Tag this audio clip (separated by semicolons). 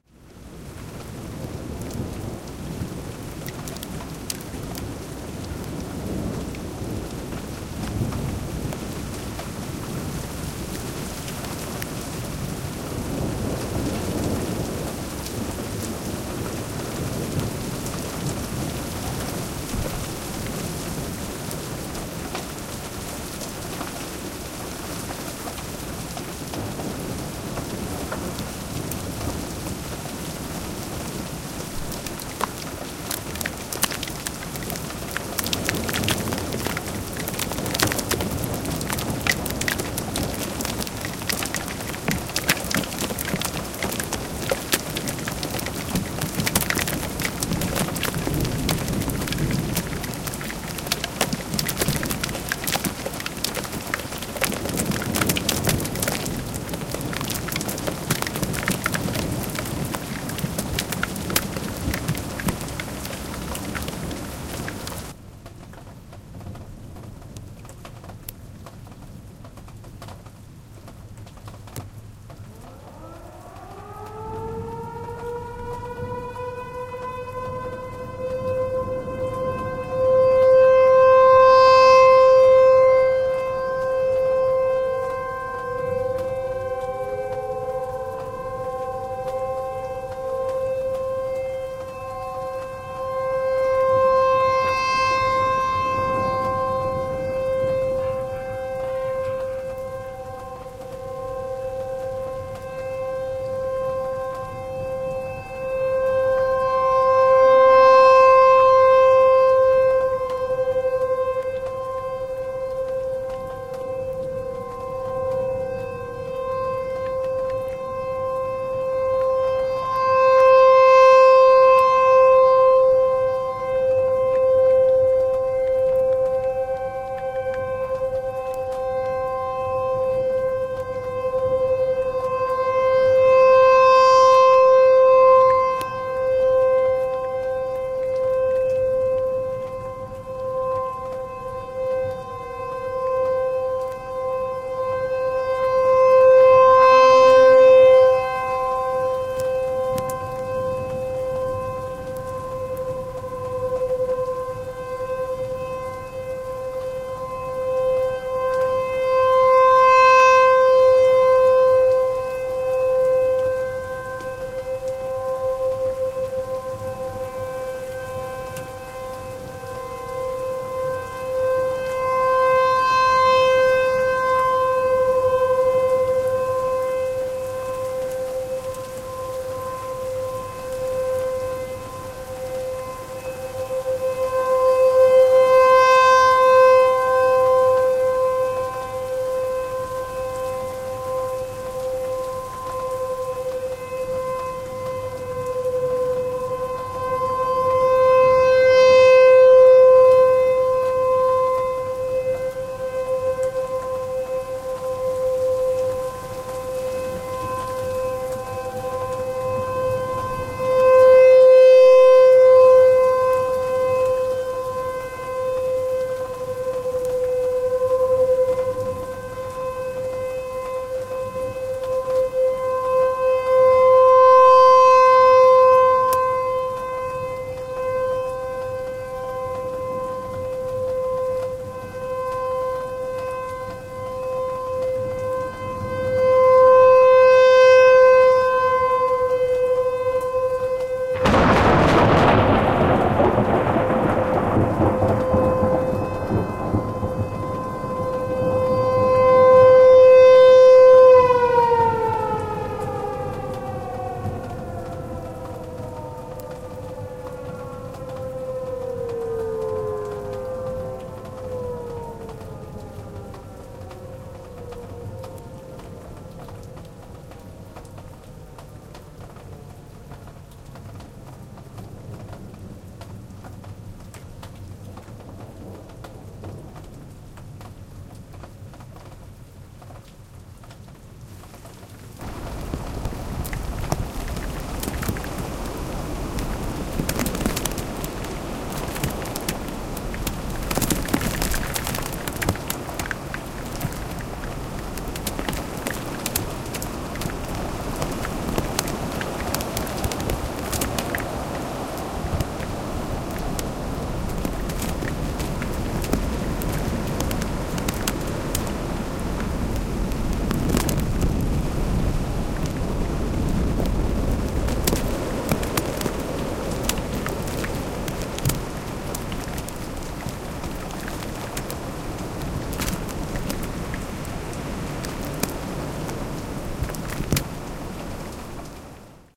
Hail; Lightning; Rain; Sirens; Storm; Thunder; Thunderstorm; Weather; Wind